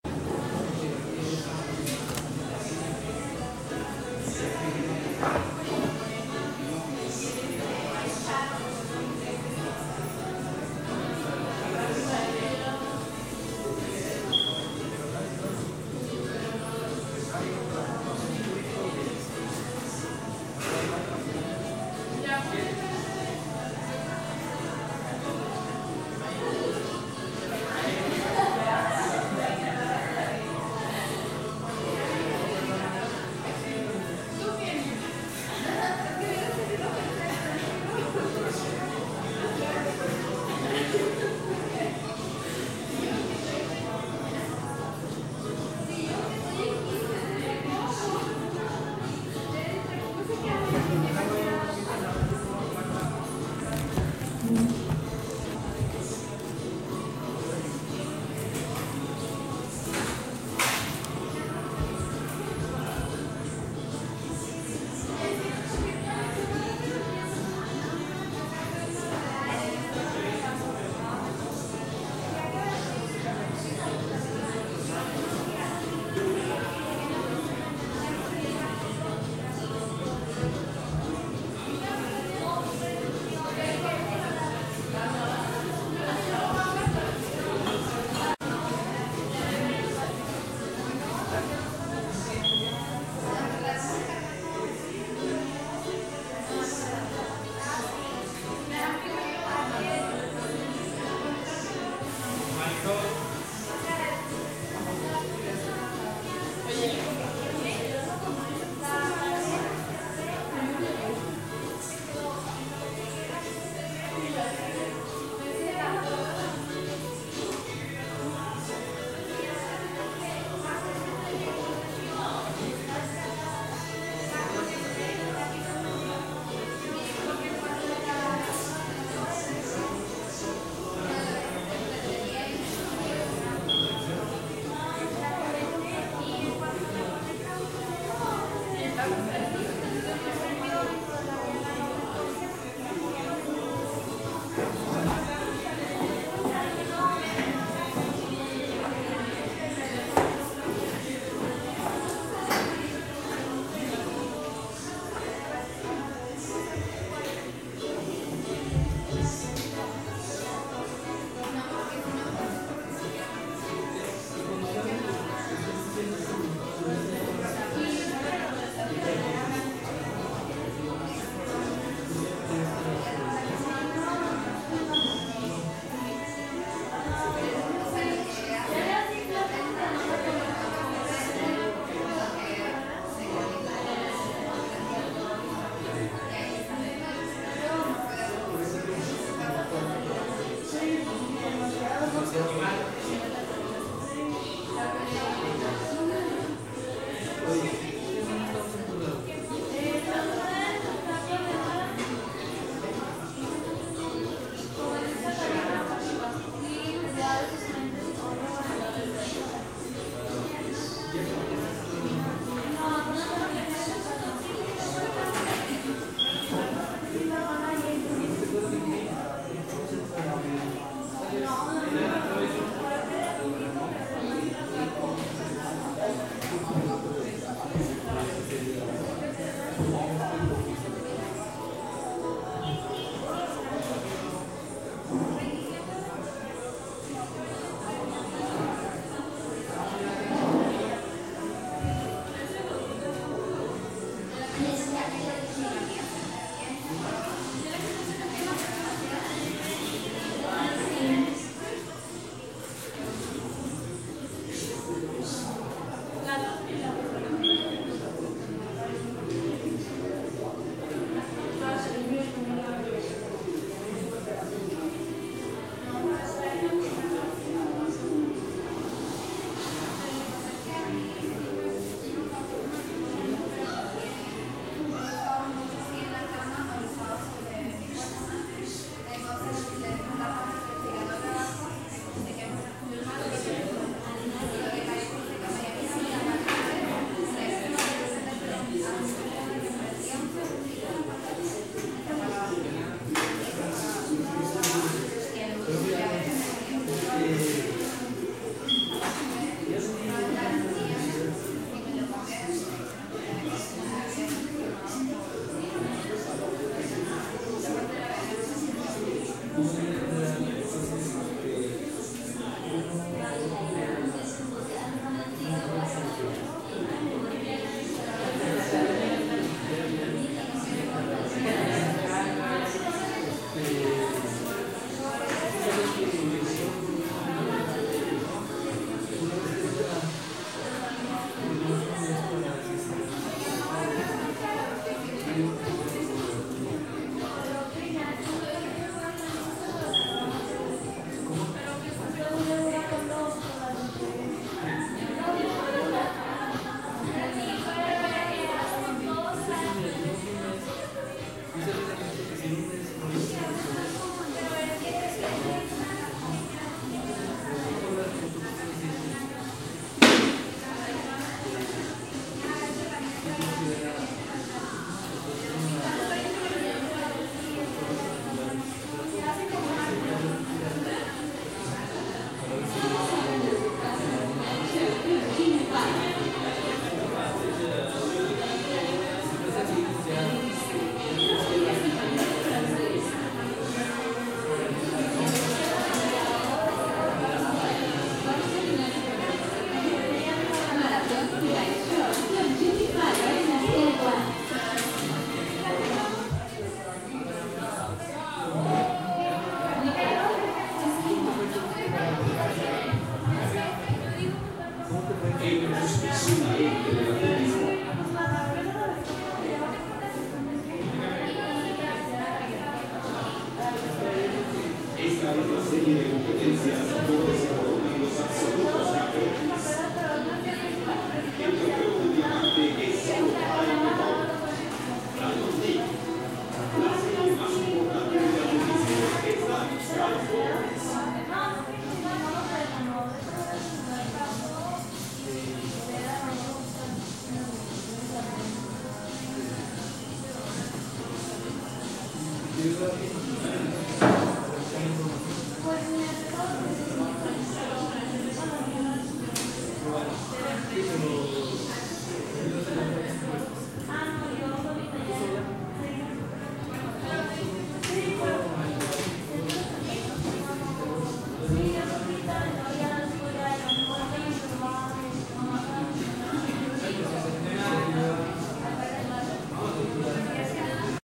cafeteria Universitaria
A coolege coffee. sonido de una cafetería universitaria.
restaurant, coffee, cafeter, restaurante, a, cafe